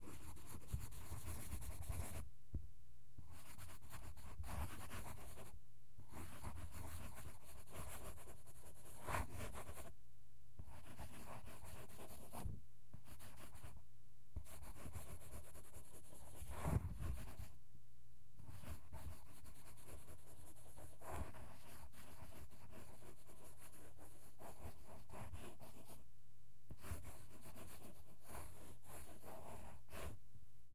Writing Pen 01
Someone writing or drawing with a pen.
draw, drawing, pen, writing, write